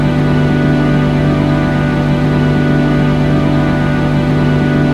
Created using spectral freezing max patch. Some may have pops and clicks or audible looping but shouldn't be hard to fix.
Atmospheric, Background, Everlasting, Freeze, Perpetual, Sound-Effect, Soundscape, Still